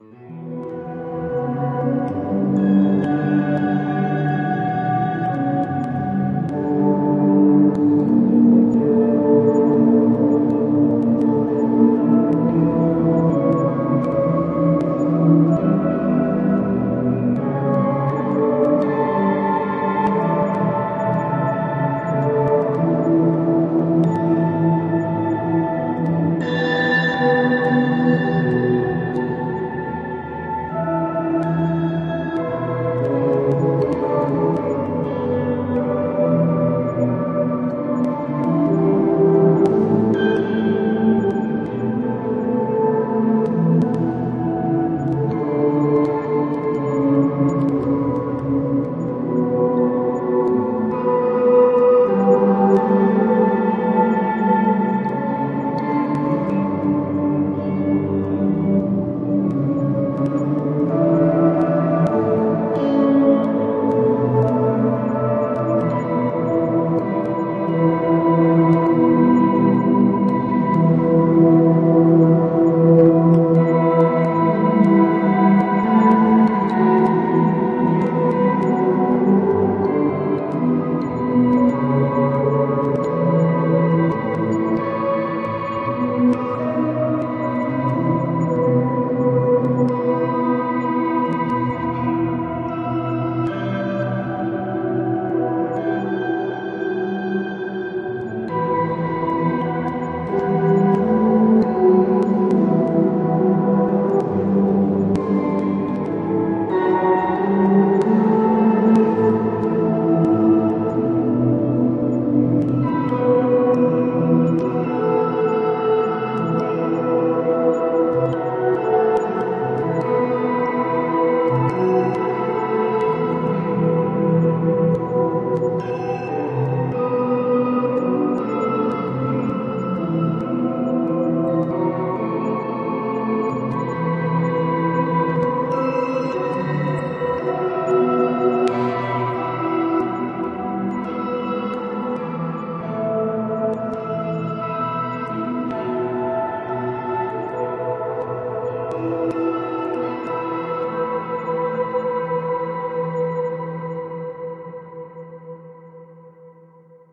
Dinner at ten
A mix of a guitar played into ableton.
space, noise, loop, dreamy, organ, amience, symphony, ambiant